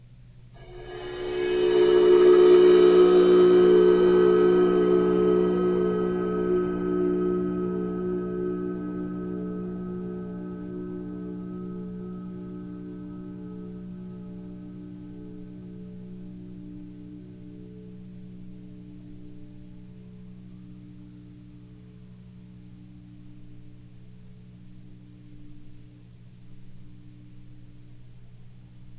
Cymbal Swell 103
bowed cymbal swells
Sabian 22" ride
clips are cut from track with no fade-in/out.
ambiance, atmosphere, soundscape, bowed-cymbal, Sabian, overtones, ambient